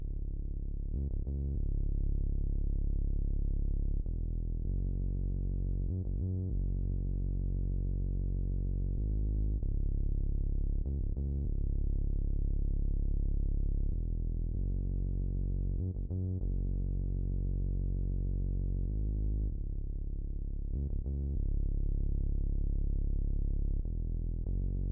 Juno Bass
Some octave bass line I have played on my Juno DI .If u think it is interesting u can use it.Show me the result if u like.
I would just like to get note how it works for you and hear it of course.But it is up to you.